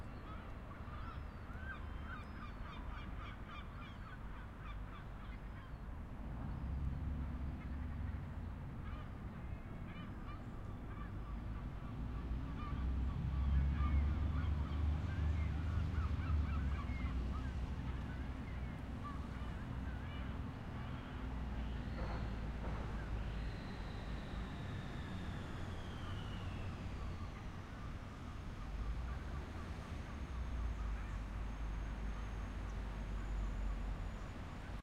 porto morning rotondo 11
Porto, Portugal, 19th July 2009, 7:15: Morning mood at the Rotondo in front of the Casa da Musica. Seagulls and other birds mix with traffic noise of public busses.
Recorded with a Zoom H4 and a Rode NT4